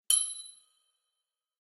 Nail Drop 005
Iron Nail dropped on Metal Stage weights... Earthworks Mic... Eq/Comp/Reverb
metal, Foley, theatre